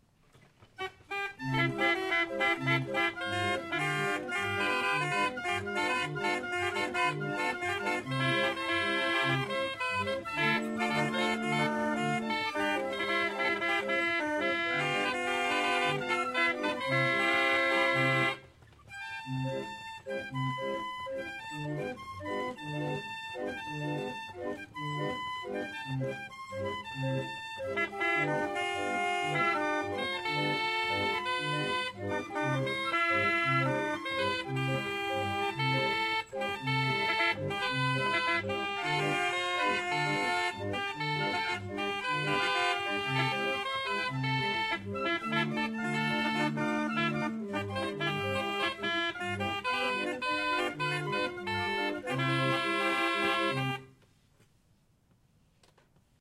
This is a very old and particular music instrument named"organillo" playing a Foxtrot tune.